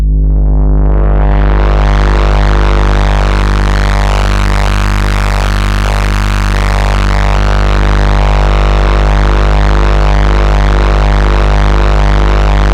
This sample was created using a Moog Sub Phatty and recorded into Ableton Live. The root is F and any harmonic variation in pitch was in the key of F minor. Enjoy!
additive, analog, bass, design, distorted, distortion, fx, modulation, moog, noise, phatty, sfx, sound, sub, synth, synthesis